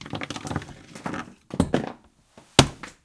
Rummaging through objects